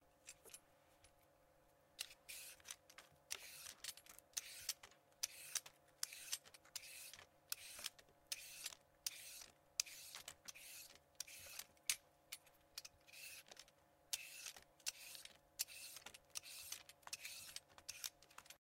Peeling a vegetable with a vegetable peeler